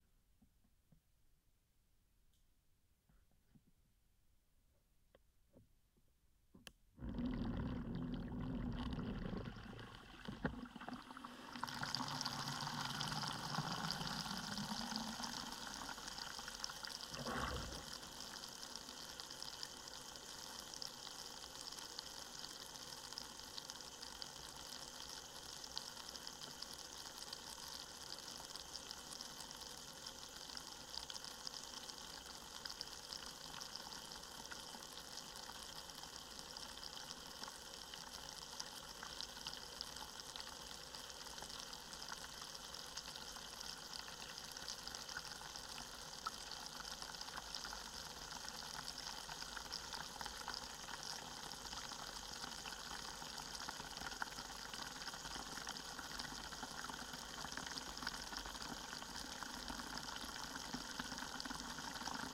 Recording of my flush refill. Korg CM300 Contact Mic. H6 Recorder.